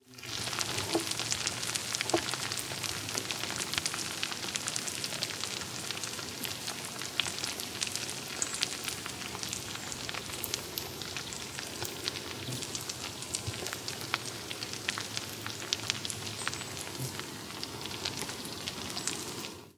Retreating Earthworms
A mono field-recording of hundreds of epigeal worms retreating from the daylight in a wormery after the lid has been removed. "And the worms ate into his brain". Rode NTG-2 > FEL battery pre-amp > Zoom H2 line in.